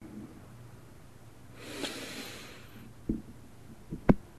female inhale through nose
female inhale through the nose
breath, breathe, breathing, inhale